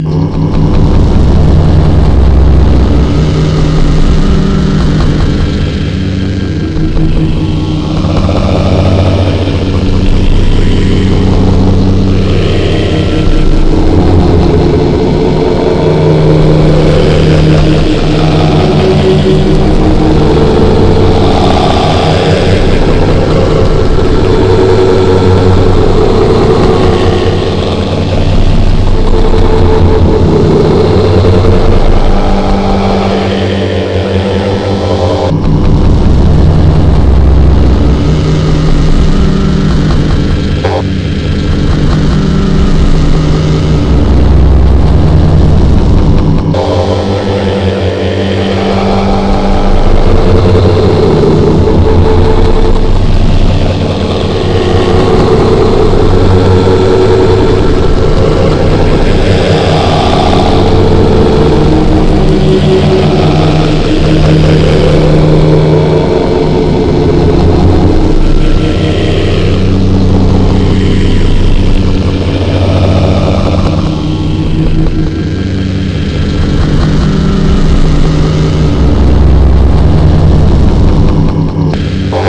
You are sad and unhappy and friends and neighbors are murmuring and evil tongues are spreading false things.
Creative Audigy Wave Studio 7
mode, feelings, meditation, sense